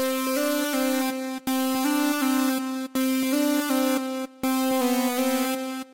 Backing Synth 3
Backing synth used in Anthem 2007 by my band WaveSounds.
162-bpm, distorted, synth